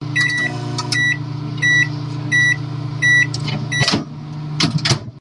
A microwave beeping and the door to it opening and closing. Recorded on Lenovo Yoga 11e internal microphone. Mastered in Audition.